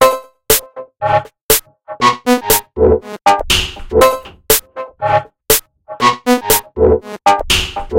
Massive Loop -32
Another weird experimental drumloop with a slight melodic touch created with Massive within Reaktor from Native Instruments. Mastered with several plugins within Wavelab.
120bpm, drumloop, loop, minimal